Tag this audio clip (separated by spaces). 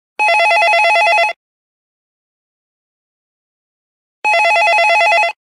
call office Phone